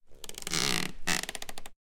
creak 2 real
A floorboard creaking recorded with an NT5 on to mini disc